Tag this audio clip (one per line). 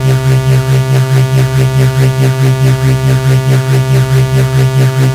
bass
dubstep
sub